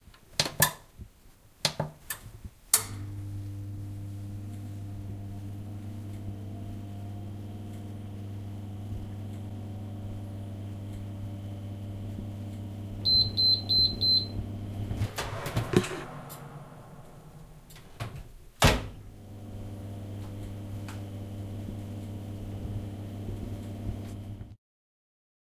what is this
KitchenEquipment WorkingOven Stereo 16bit
messing with the oven
16, bit